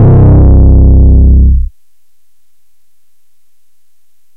made with vital synth